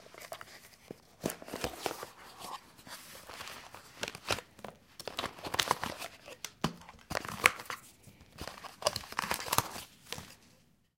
Opening a small cardboard box. (Recorder: Zoom H2.)